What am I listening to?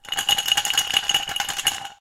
Ice Cubes Glass Shake 02
Ice cubes being shaken in a glass